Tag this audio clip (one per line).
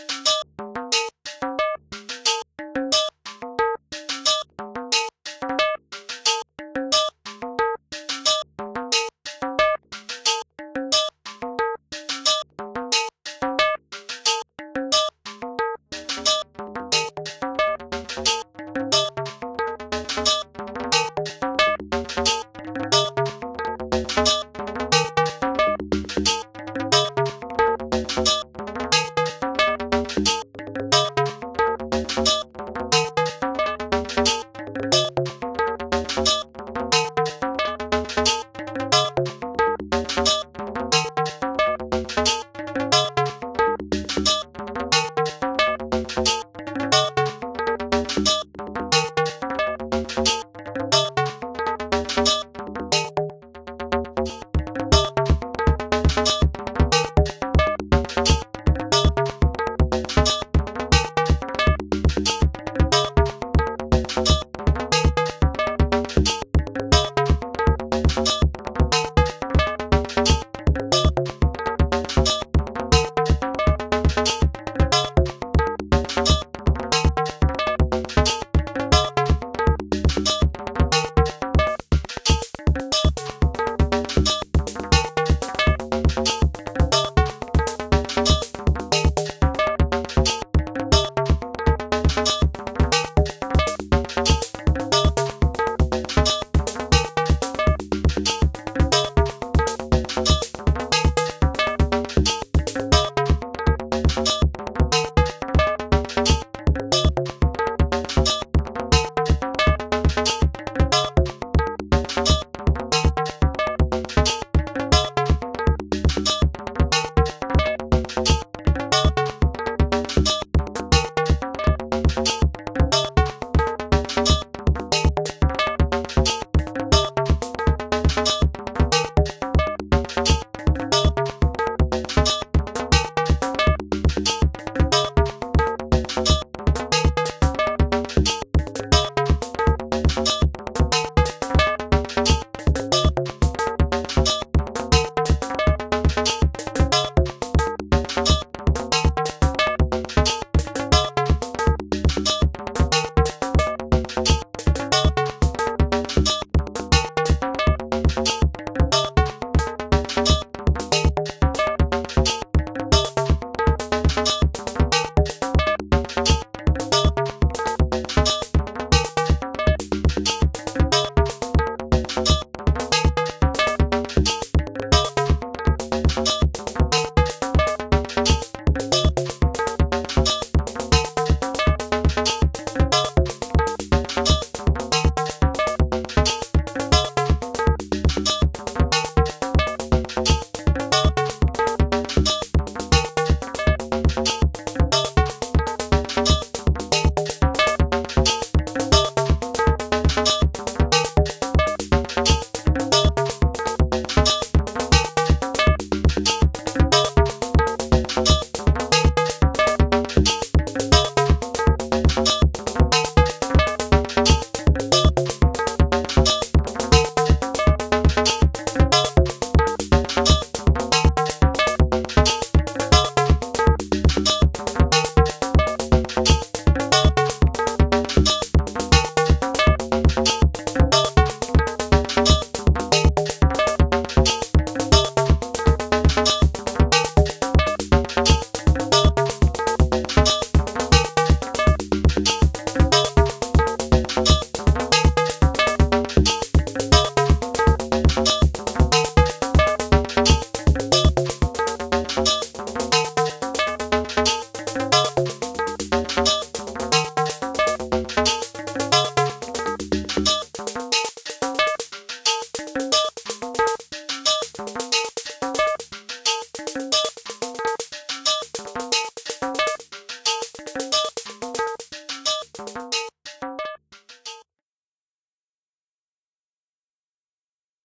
loop
beat
rhythm
polyrhythm
synth
modular
drum
electronic
synthesizer